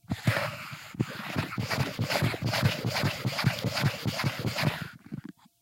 bike pump fast
I attached a Cold Gold contact mic to a small bike pump, then pumped. There is some minimal processing to remove the low frequency content from the movement of the contact mic.
pump, contact, bicycle, scrape, foley, bike, air